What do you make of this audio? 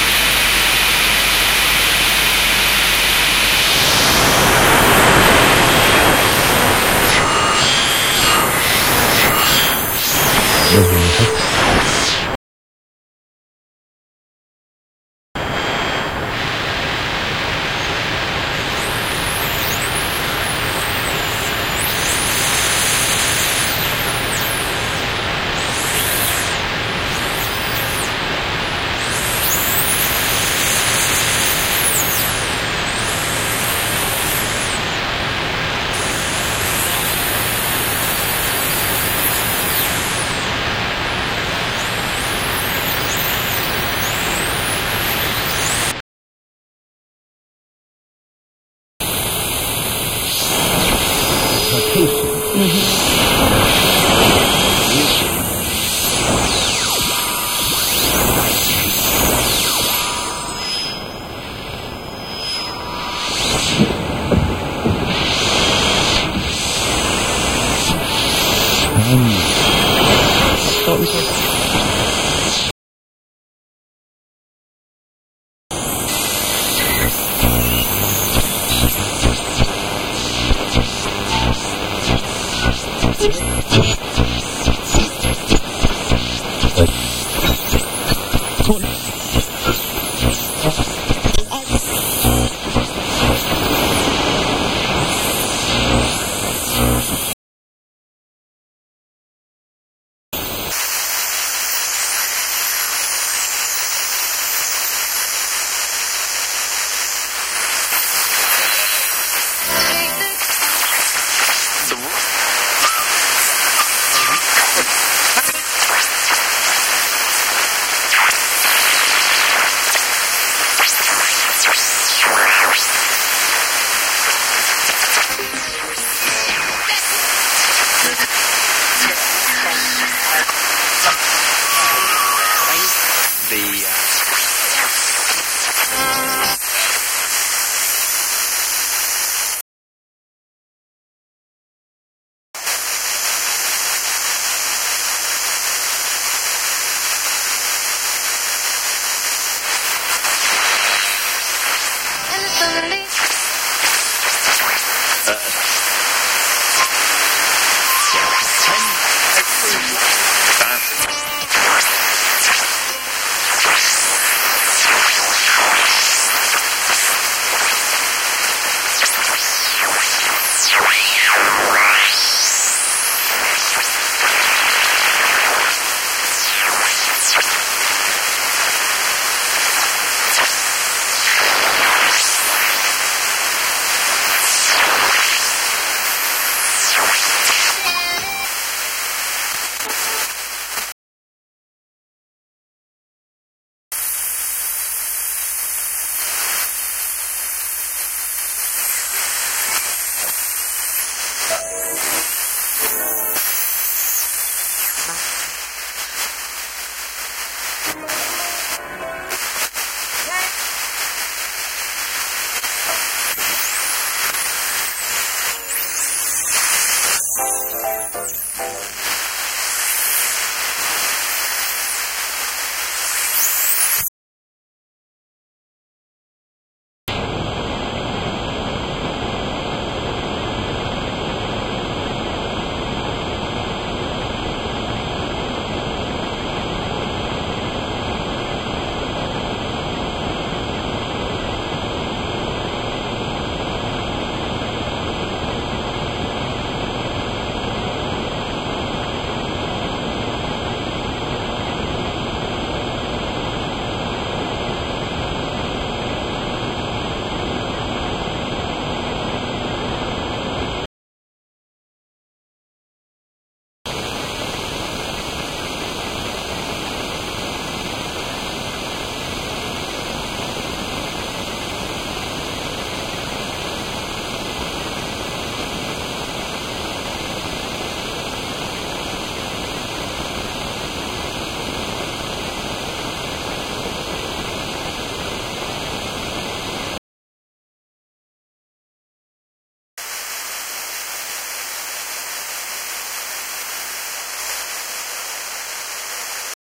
Using a variety of 1980s radios connected via a headphone output to the computer, I tuned in - across the entire bandwidth - of the MW and LW channels. I also captured the static hiss of those bands, 'dead air'. There are small clips of various stations along the way but I was looking for that 'tuning in' sound that was so familiar when radio was the central part of every teenager's life.